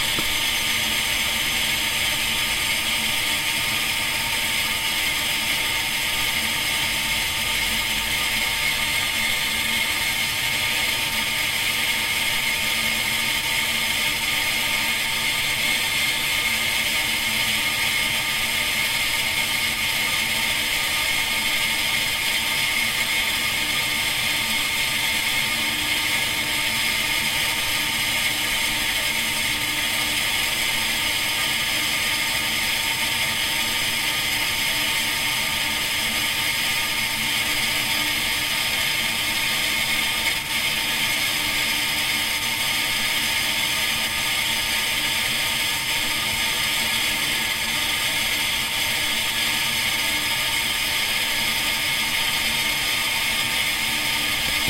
water pipe
Water flowing through a metal pipe to a water tank with engine running. Recorded with ZoomH4n.
flow, flowing, liquid, pipe, pipeline, piping, stream, water